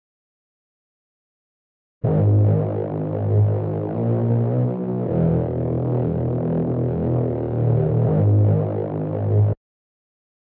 Dark Scary Sound
A dark, scary sound for dark, scary songs (or videos).
anxious, creepy, dark, drama, dramatic, eerie, fear, fearful, frightful, ghost, gothic, Halloween, haunted, hip-hop, hiphop, horror, imminent, nightmare, phantom, scary, shady, sinister, spectre, spooky, suspense, terrifying, terror, thrill